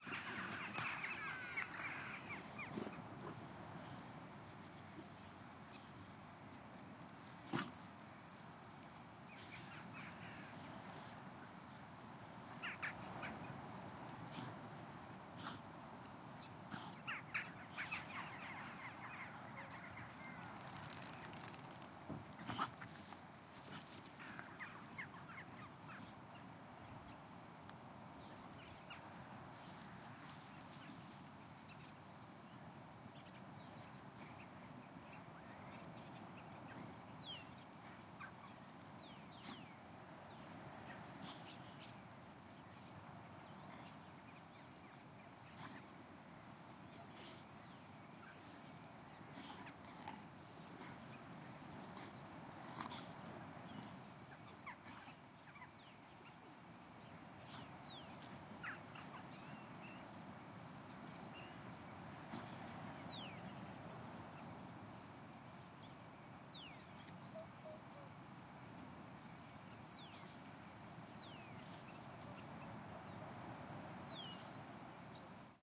COUNTRY-farm-yard
COUNTRY farm yard
COUNTRY, farm, yard